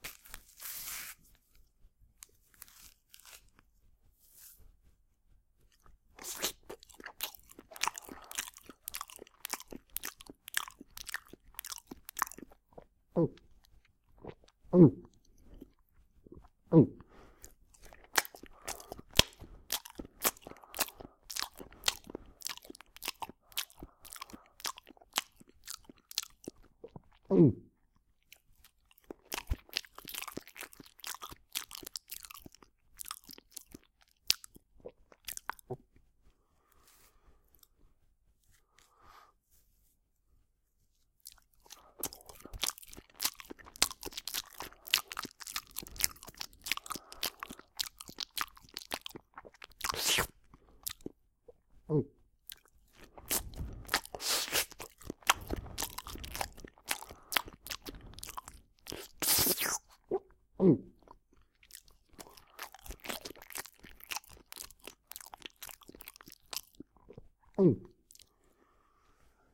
Banana Munching
Nom nom. Tasty!
Recorded with Zoom H2. Edited with Audacity.
banana, bananas, chomp, comedic, comedy, eat, eating, food, fooding, fruit, health, healthy, nom, nomnom, nourishment, tasty